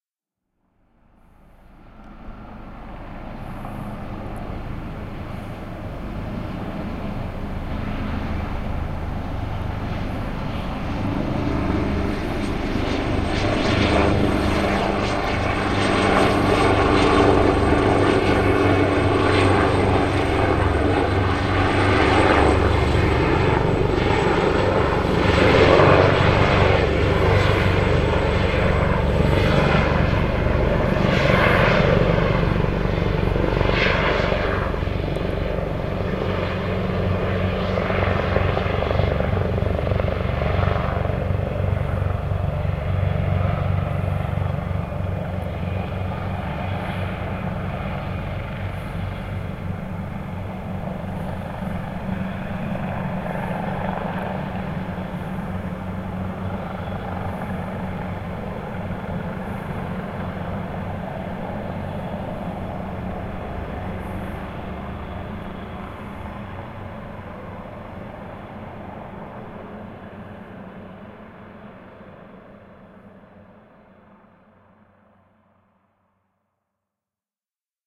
22sqn search sea rescue helicopter
R.A.F Valley seaking rescue helicopter on late nigth exercise